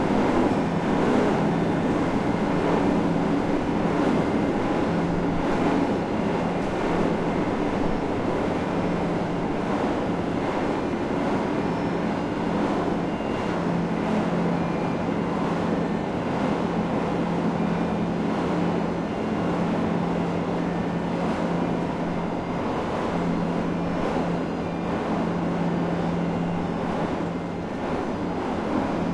recorded near crane